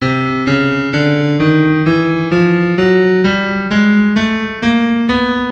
Piano chromatic scale C3 to B3
This is a chromatic scale synthesized from MuseScore, using the piano sound from FluidR3 soundfont. Edited on Audacity for changing from Stereo to Mono 16-bit.
The tempo is about 130bpm.
The register of notes spans from C3 to B3
piano, mono, scale, chromatic, fluidR3